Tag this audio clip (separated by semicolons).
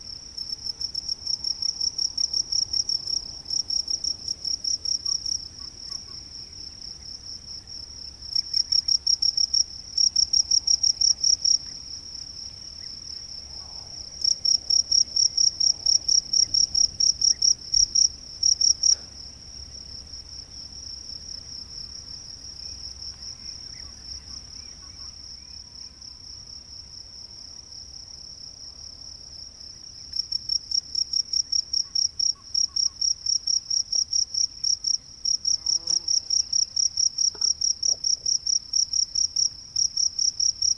birds; crickets; field-recording; insects; marsh; nature; spring